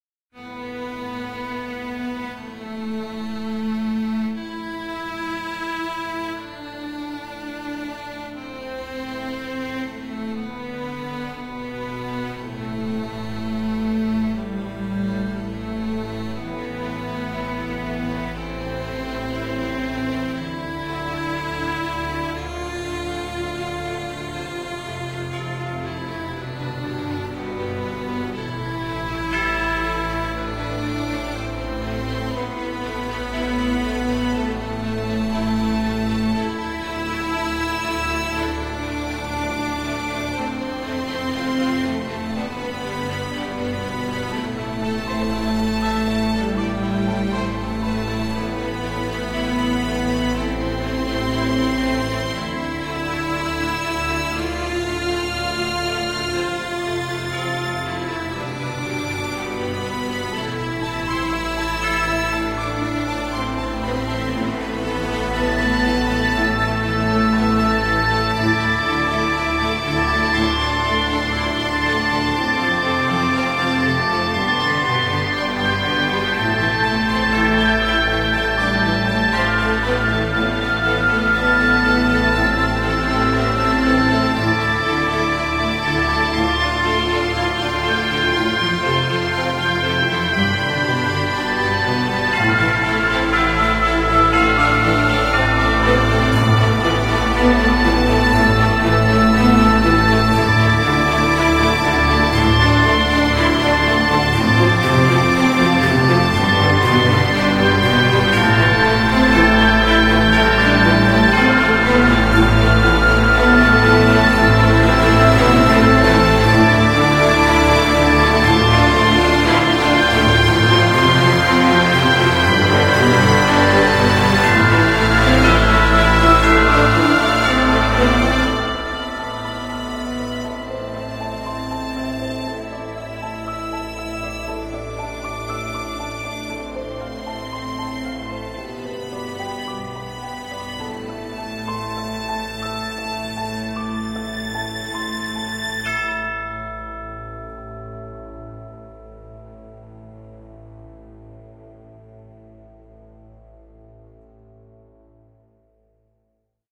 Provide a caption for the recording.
1. nomen unum
Beautiful evolving epic progression